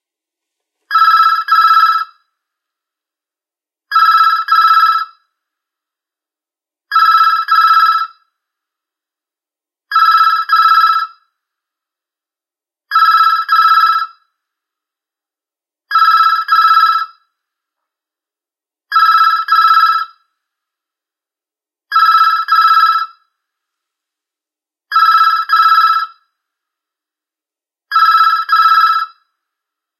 Telephone Ring UK 1
A stereo recording of an UK telephone ringing (cut to loop). Rode NT 4 > FEL battery pre-amp > Zoom H2 line in
ring, stereo, uk, xy